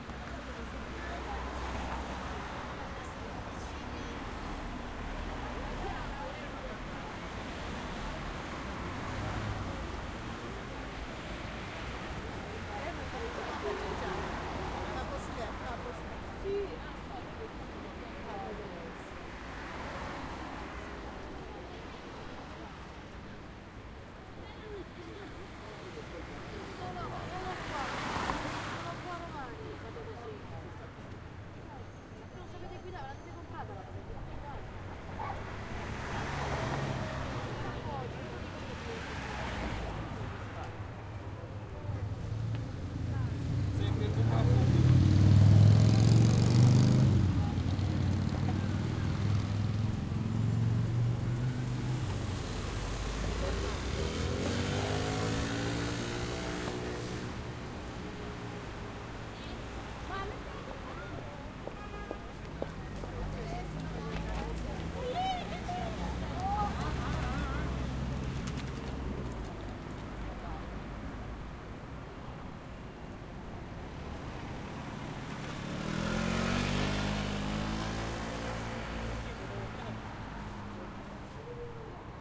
Road Traffic in Rome
Zoom h4n stereo recording of a moderately peaceful central square in Rome, Italy on a sunday morning. Good capture of a motorcycle passing by as well as voices and cars.
Traffic, Rome, Street, Italy, Field-recording, Passing, Road, Voices, Motorcycle, City